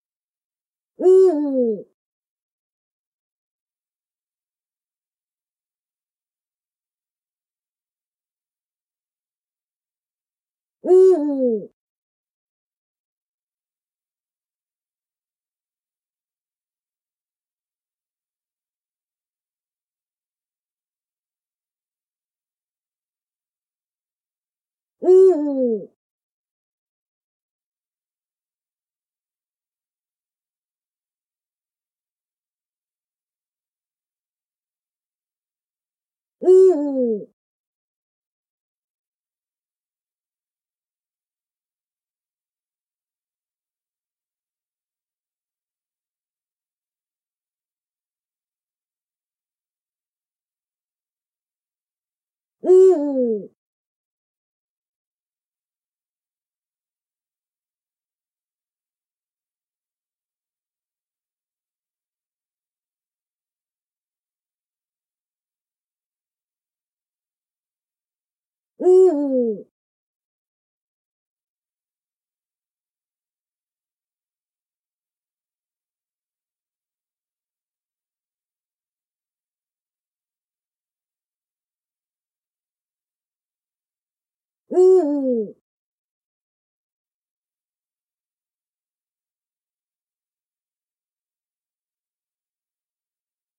Eurasian eagle-owl (Bubo bubo) - Bird - Animal - Forest - Uhu - Eulen - Greifvögel - Vögel - Wald
V, Animal, eagle-owl, Forest, gel, Bird, Uhu, Greifv, Eulen, owl, Park